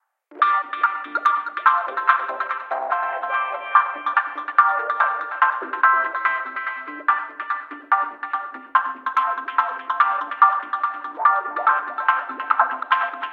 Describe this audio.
DMDR 72 Gmin Skank Distance
DuB HiM Jungle onedrop rasta Rasta reggae Reggae roots Roots
HiM, Jungle, reggae, roots